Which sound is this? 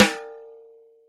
Ludwig Black Beauty Snare Drum Open Rimshot Unprocessed
Sampled of a Ludwig 14 inch by 6.5 inch Black Beauty snare drum. This is one of the most popularly recorded snares of all time. Enjoy!
cymbal, drum, ludwig, paiste, pearl, percussion, sabian, yamaha, zildjian